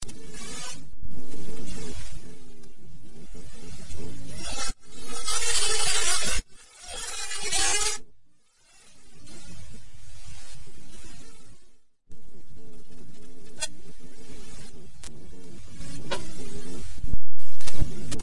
Gauss shots mixdown
A mess of high pitched tones that might distract dogs or or animals with sensitivity to high pitched sounds